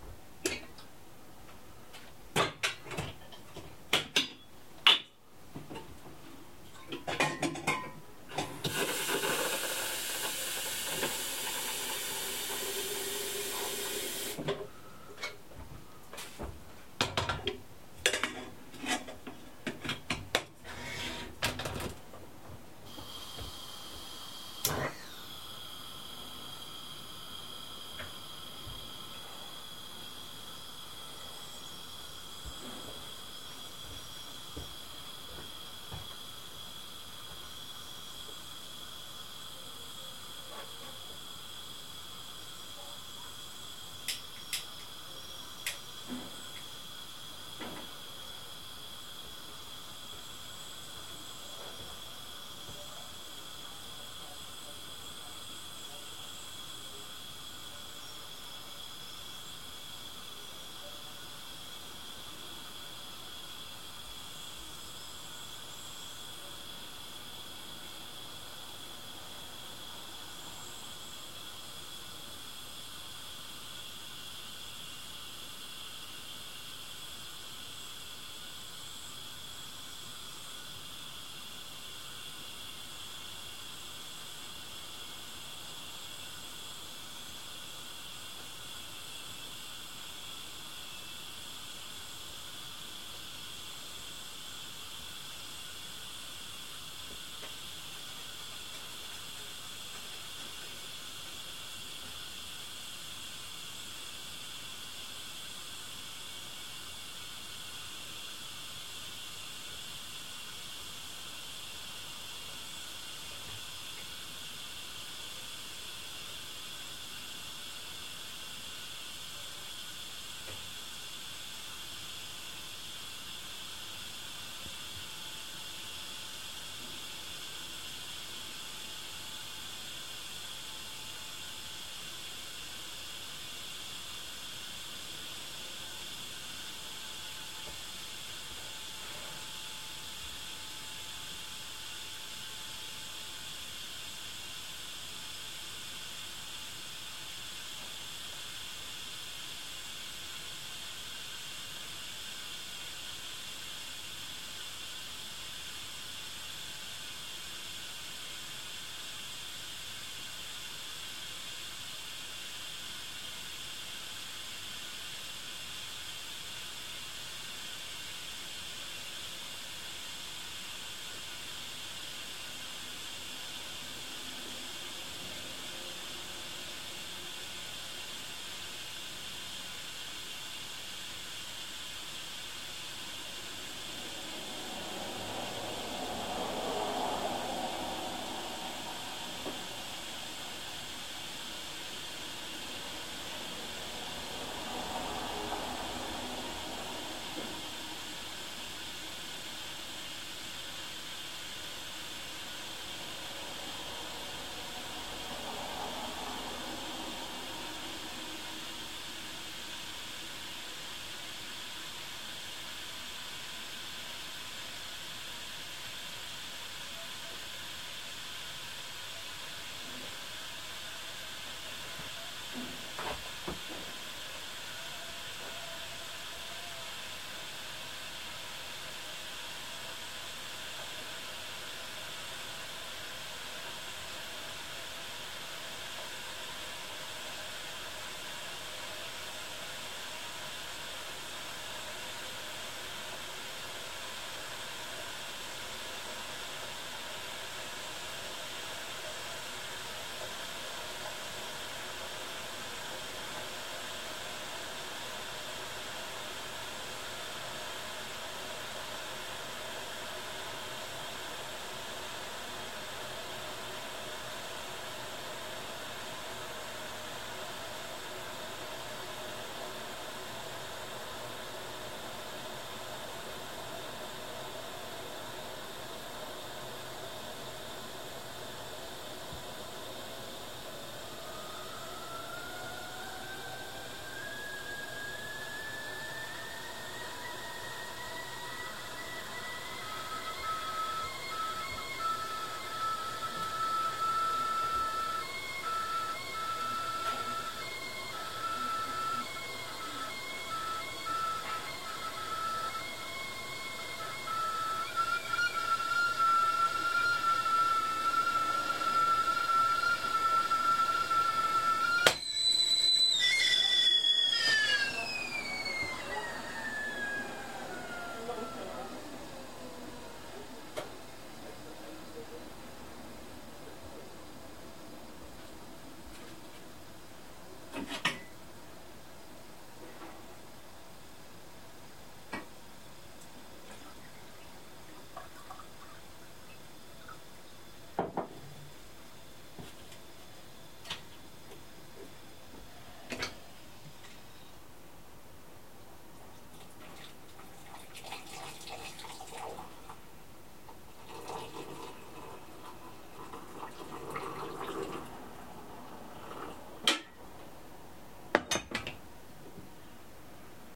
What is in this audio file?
Recorded with audio technica BP4027 on 4 Dec 2019 Berlin
Making a cup of tea boiling water in a gas stovetop hob with a whistle kettle
cuppa-tea, teakettle, cup-of-tea, gas, hob, whistle-kettle, stovetob, kettle